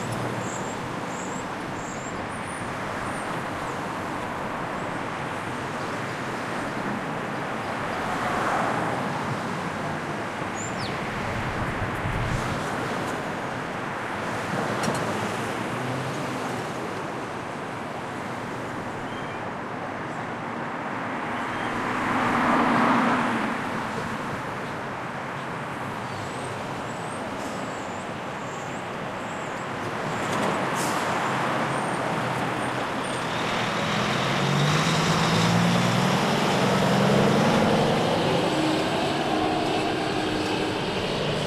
Afternoon traffic on North Carolina Ave. in Washington DC. The recorder is situated on the median strip of the street, surrounded by the rush-hour traffic, facing the Capitol in the southwest.
Lots of cars, buses and trucks passing, heavy traffic noises.
Recorded in March 2012 with a Zoom H2, mics set to 90° dispersion.